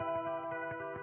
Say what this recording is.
electric guitar certainly not the best sample, by can save your life.
arpeggio, electric, guitar, spread